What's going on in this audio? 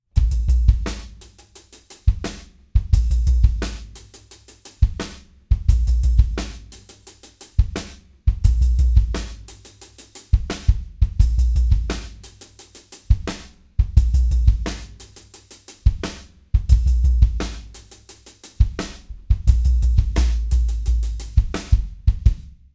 mLoops #9 174 BPM
A bunch of drum loops mixed with compression and EQ. Good for Hip-Hop.
Compressed, EQ, Electronic, Hip